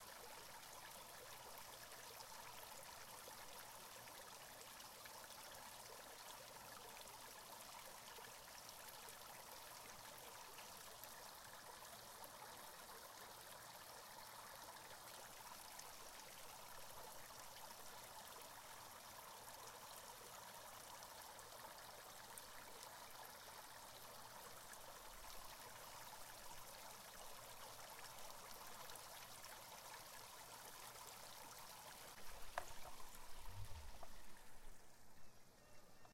Uni Folie FountainWater2
fountain, splash, splashing, water